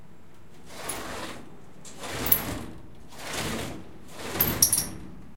glass-door-slide01
Sound of a glass sliding door being opened and closed. Recorded with a Zoom H4n portable recorder.
slide, door, close, closing